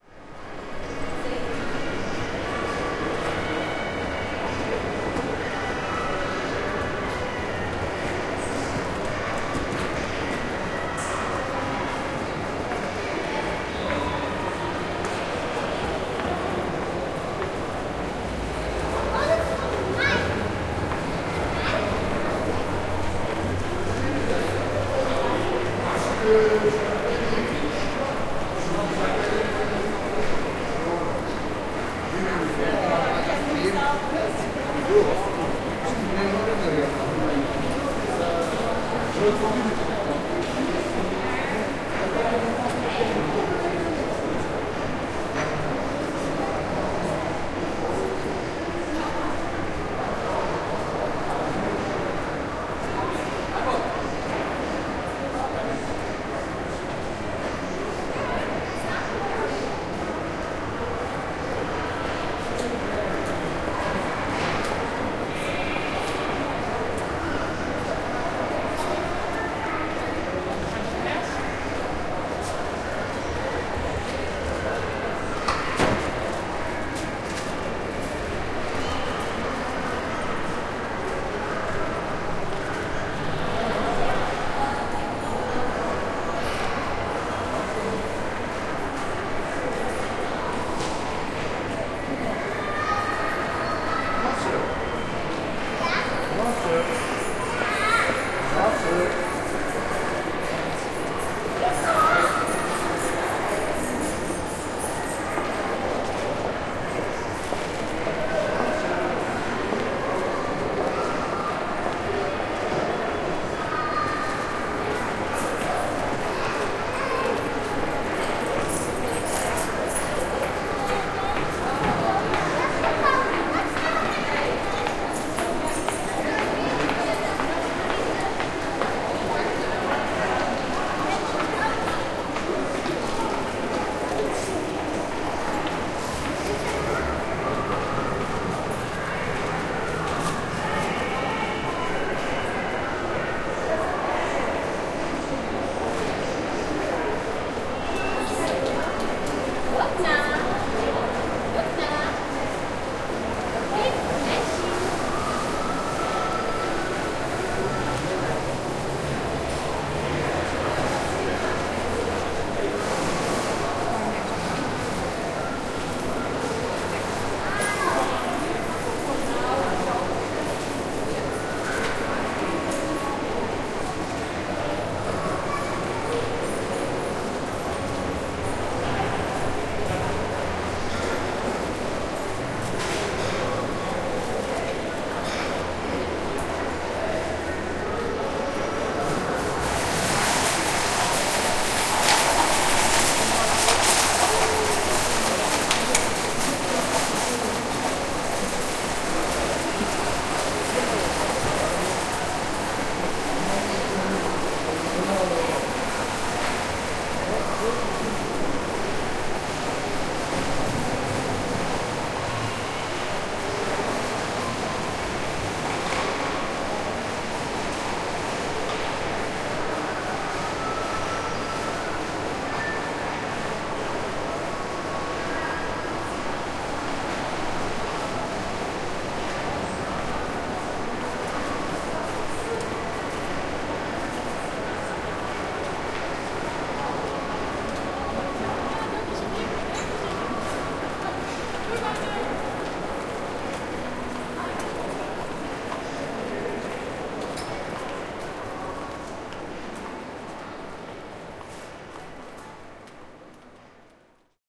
Shopping mall in recorded, in MS stereo, same recording dif mics